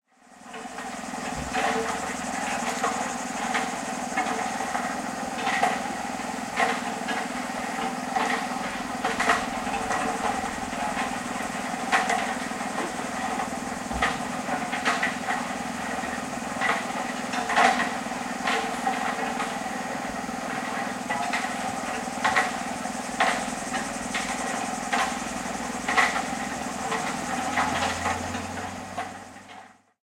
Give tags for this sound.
works; constructing; drilling; hammering; work; power-tools; worker; build; travaux; TP

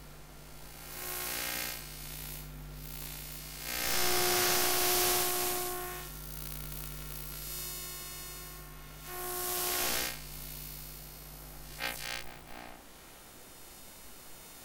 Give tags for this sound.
buzz; cable; electricity; fault; faulty; hiss; noise; sparking; Sparks; static

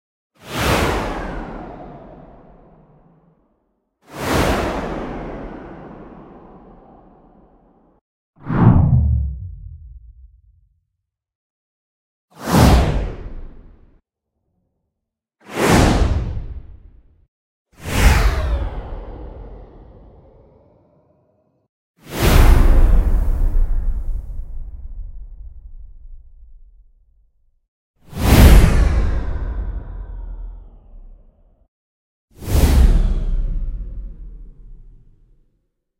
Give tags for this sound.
whip; woosh; wooshes

